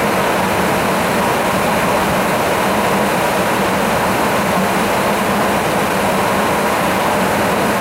Jet Plane Wind Noise Loop of a KC-135 Stratotanker 3
Wind sound around a camera filming the refueling of jet fighters from a KC-135.
bomber, flight, loop, motor, pilot, repeating